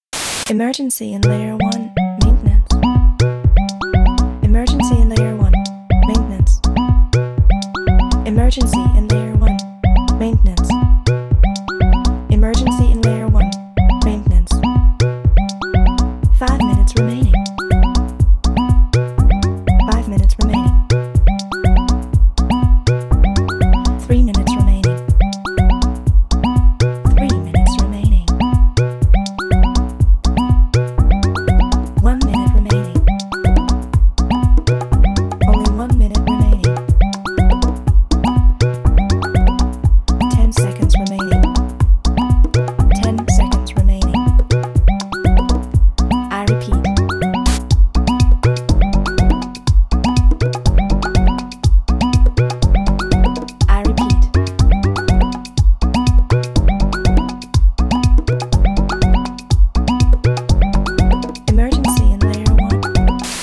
Dance Countdown
When can we dance again?!
Uses this amazing vocal sample from Audiophile_Kingsbury:
Although, I'm always interested in hearing new projects using this sample!
countdown, dance, electronic, emergency, minimal, music, percussion, robot, techno, voice